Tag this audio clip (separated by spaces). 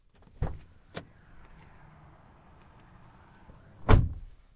car door